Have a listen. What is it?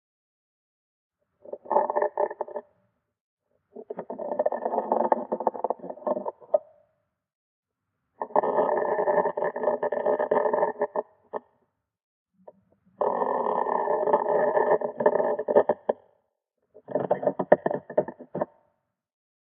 Slow wooden creaks x5
A recording of a frog noisemaker much like this one with the pitch lowered and some subtle reverb added.
Suitable for old houses or wooden ships creaking.